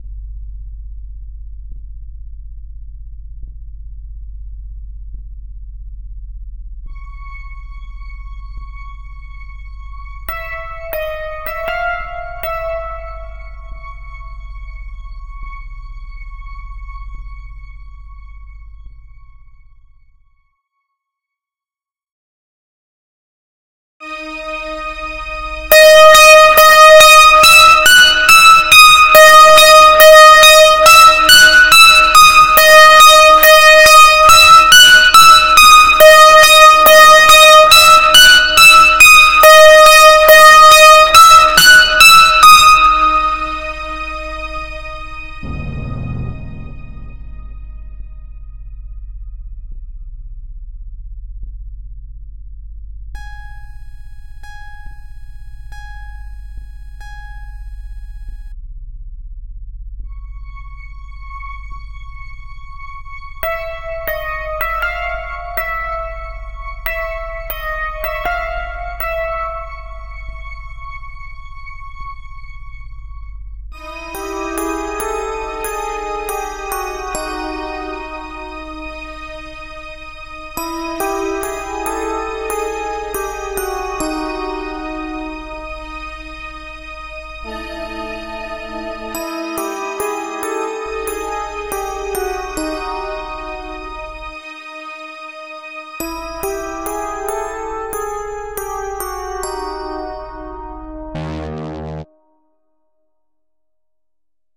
I made this track with lmms. Its a little horror and scary. Just listen and decide if you like it or not... You dont have to credit. Write in the comments for what you used it!